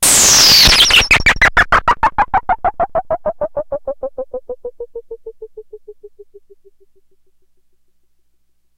nord glitch 012
A noisy sound created with FM feedback using a Nord Modular synth. It is quite loud. Be nice to your ears.
fade, nord, noise